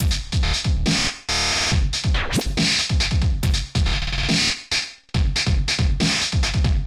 dubstep drumloop amped glitch 140BPM
A quick amped dubstep glitch loop.
200, gross, beat, bpm, loop, stutter